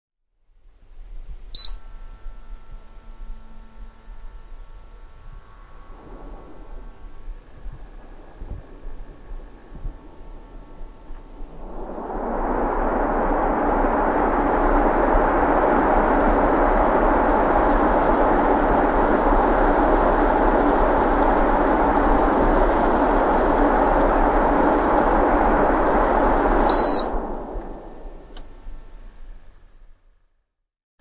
Air Conditioner, On Off, A
Raw audio of an automatic air conditioner being switched on, left running for a few seconds, and switched off. All operated via a remote, from which the button beeps can be heard.
An example of how you might credit is by putting this in the description/credits:
The sound was recorded using a "H1 Zoom recorder" on 27th August 2017.
ac, air, conditioner, conditioning, off, On, unit, vent, ventilation